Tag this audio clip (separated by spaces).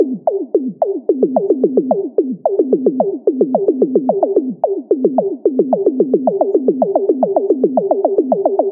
drumloop 110bpm bongos